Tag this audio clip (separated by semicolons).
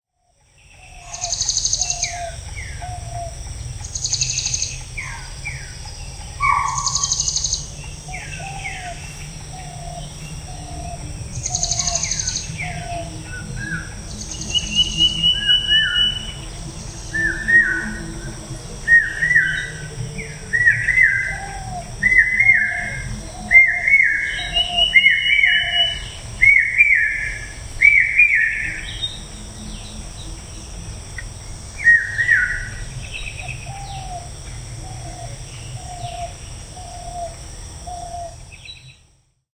jungle; chirping; forest